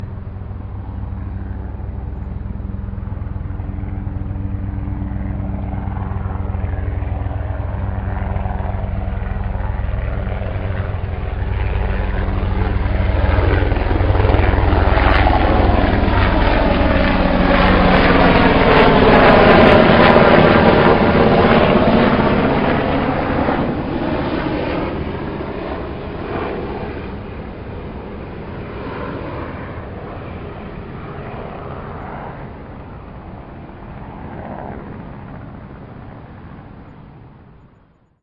Stereo recording of a Blackhawk helicopter flying past.